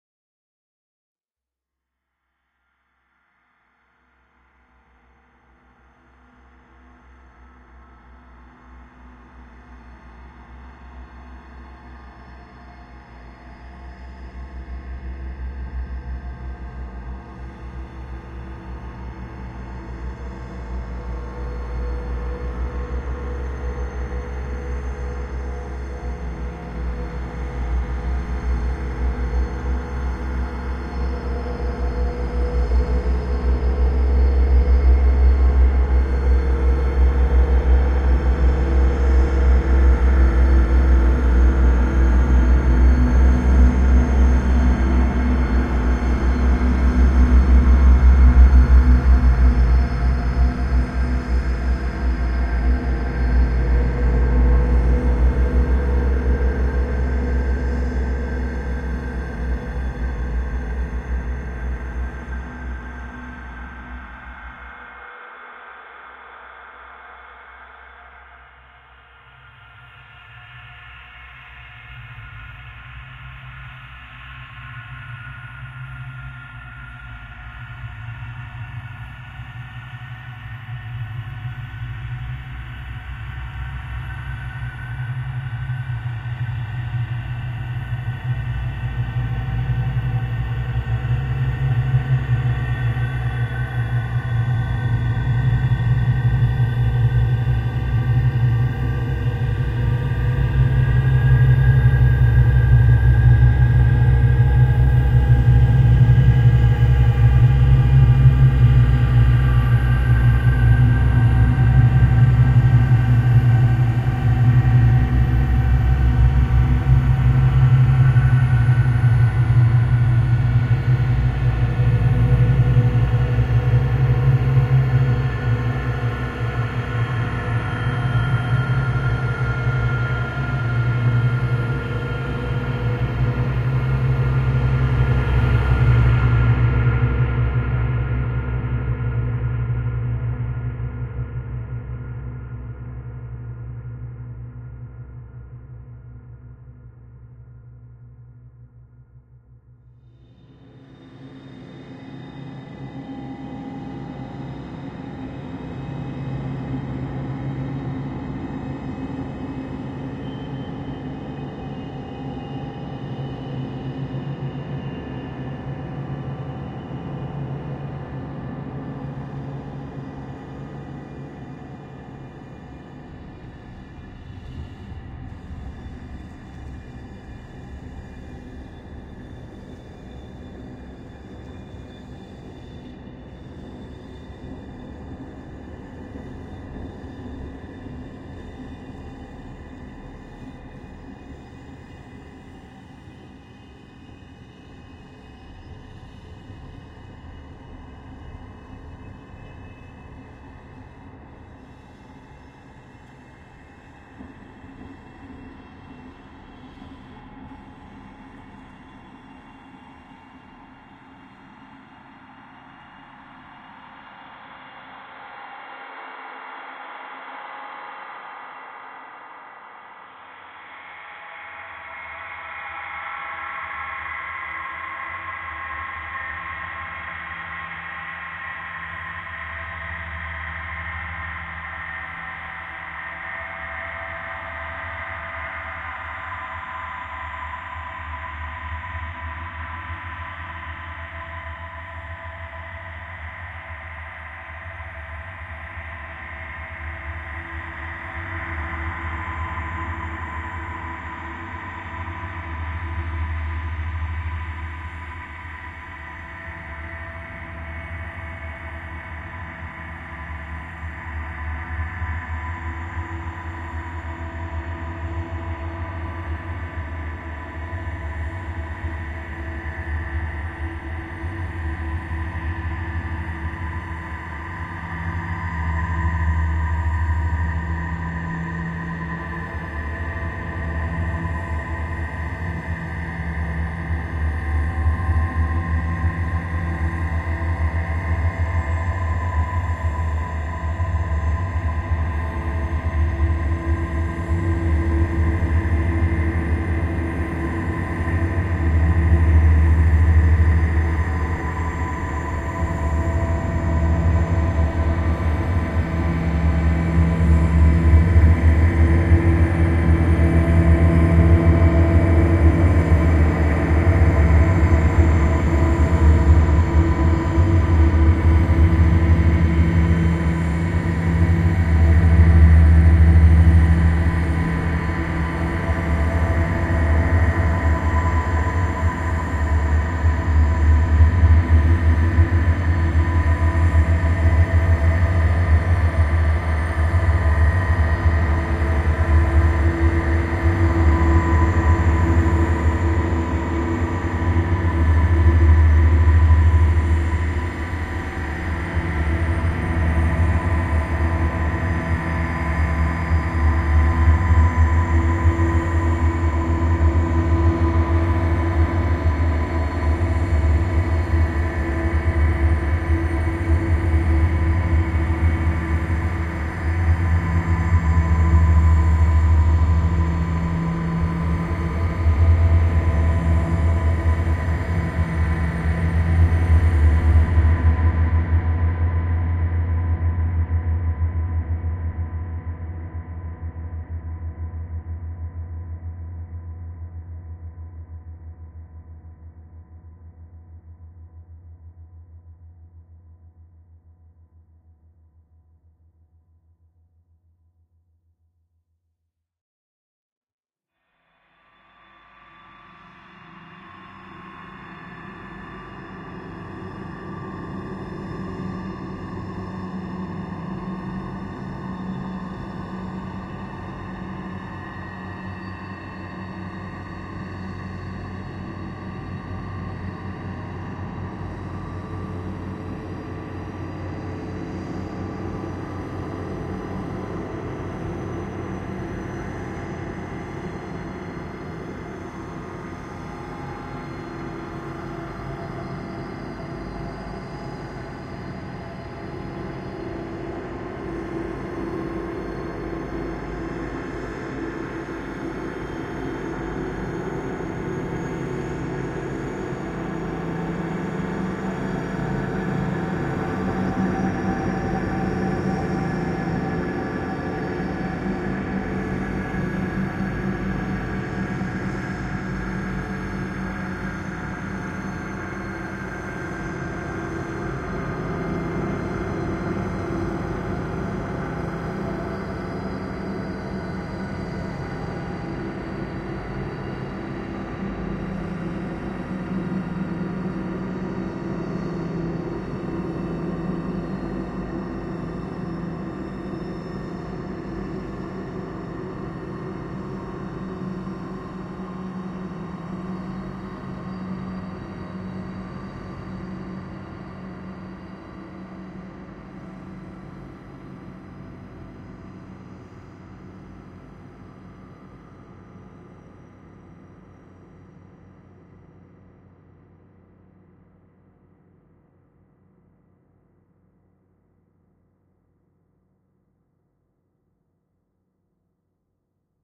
Ambient, Drone, Level 2
Level sound, ambient.
ambiance, ambient, anxious, background, background-sound, creepy, disgusting, drone, dying, evolving, experimental, fear, Gothic, haunted, horror, level, light, location, nature, pad, scary, sinister, soundscape, stalker